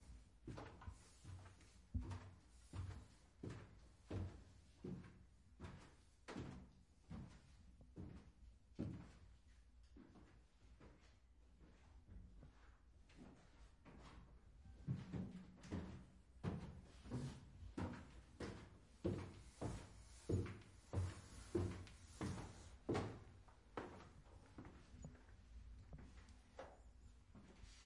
Someone walking up and down the stairs, holding the handrail. Wearing indoor shoes. Recorder with Sound Device 702T in MS